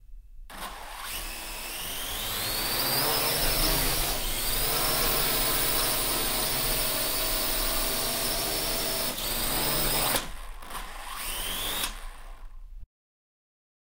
Quadrocopter recorded in a TV studio. Sennheiser MKH416 into Zoom H6.